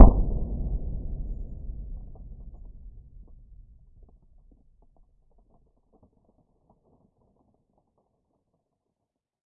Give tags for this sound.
bang; bounce; crack; knall; pop; puff; smack; smacker; snapper; whang

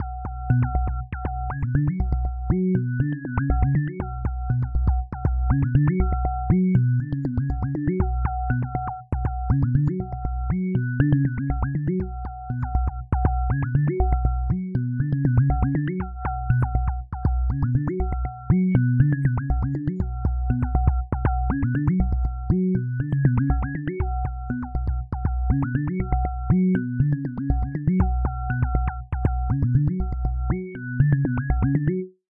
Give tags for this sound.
electronic; sample; electro; music

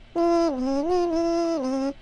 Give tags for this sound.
bunny; ner-ner; voice

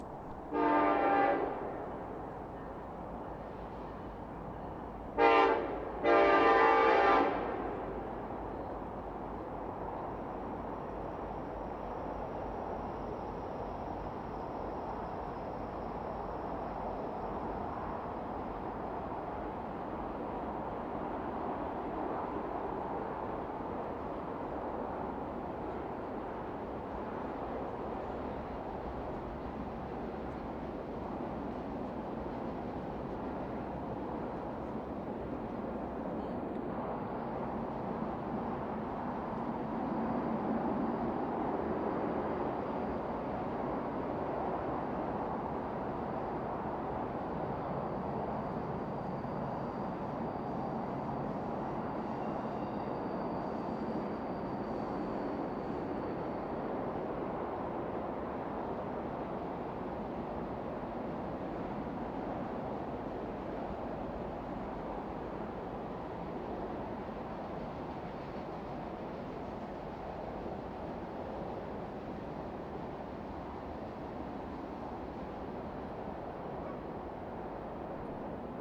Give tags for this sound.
Highway; Distant; Train

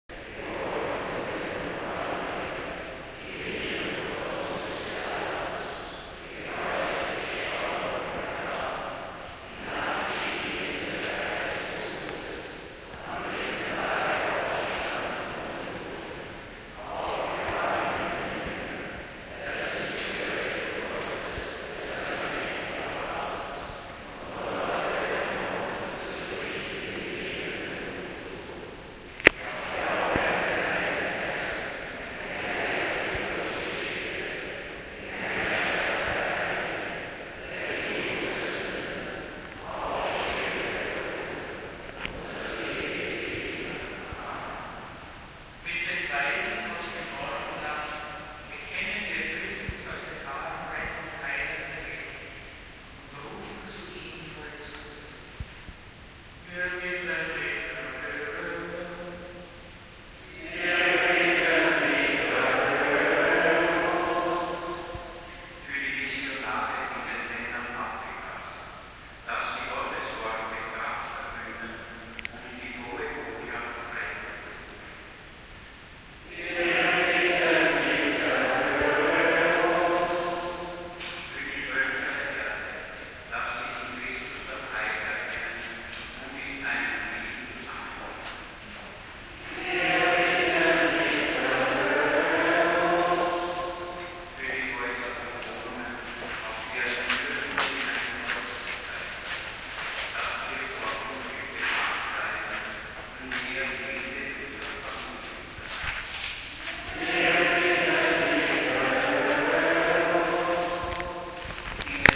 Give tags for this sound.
church; people; preacher; reverb; vienna

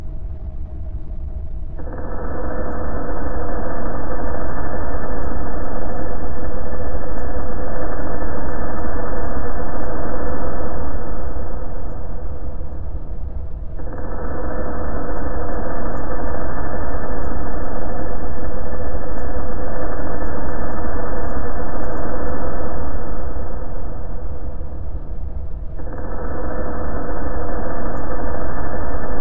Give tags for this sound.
elements; noise; subsonic; tectonic

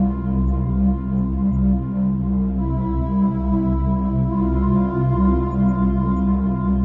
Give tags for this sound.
drums; loops; guitar; free; filter; sounds

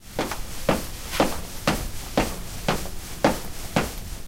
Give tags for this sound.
campus-upf; UPF-CS14

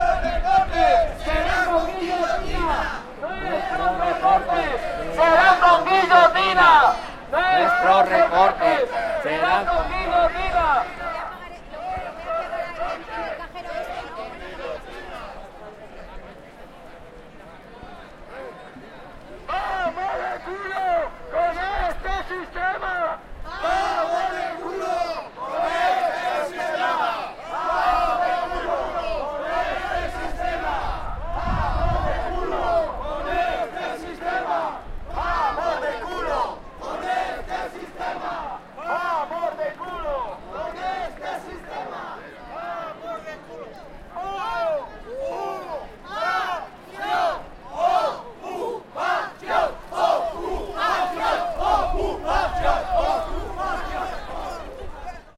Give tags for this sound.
demonstration; habitatge; hipoteques; imPAHrables; manifestaci; n; PAH; protestes; protests; Valencia

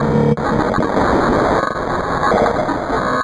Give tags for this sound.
robot; machinery; factory; sound-effect; mechanical; 8-bit; machine; weird

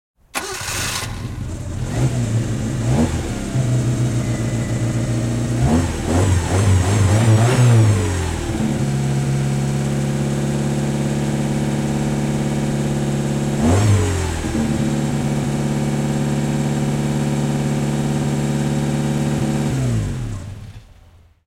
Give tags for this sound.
engine; car; gas

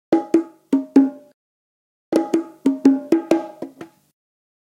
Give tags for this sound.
congatronics; tribal

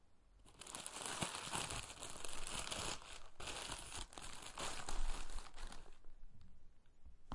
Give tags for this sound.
aip09; packaging; eating; food; sandwich; wrapper; crinkling

